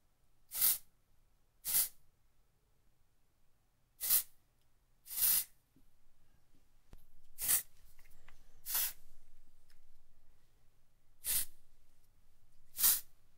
a few short deodorant sprays.I used SE electronic 2200 mic going straight to M Audio fasttrack pro